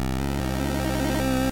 SFX-Imminent
An 8-bit jingle. It seems like something bad is going to happen...
Video-game, Jingle, 8-Bit, SFX, Square, 8Bit, Game, Danger, NES